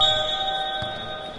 Silbato baloncesto deporte
basket, silbato, sport